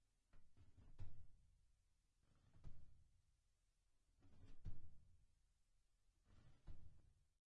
Filing,Cabinet,Drawers,Shut,Mech,Foosteps,Clank-Z3H2 01-01
Part of a series of various sounds recorded in a college building for a school project. Recorded with a Shure VP88 stereo mic into a Sony PCM-m10 field recorder unit.
field-recording, school, sfx